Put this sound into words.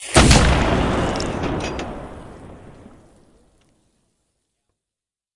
You get to shoot a monster with a cannon! There is a bit of a chain sound because this cannon has the cannon-ball on a chain like a huge pop gun.
From my short, free, artistic monster game.
war, blast, kaboom, boom, projectile, bang, shot, military, bomb, artillery, howitzer, explosion, weapon, explosive, shooting, army, gun, tank, cannon